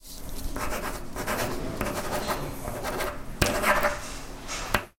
pen write
sound produced by the friction of the pen when writing on paper. This sound was recorded in silence environment and close to the source.
campus-upf, pen, pencil, UPF-CS13, write